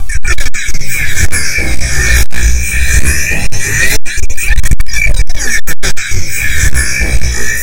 it's a record sound of water. The tempo was speed up and the pitch increased. To finish, the sound was mixed to realise an electric effect.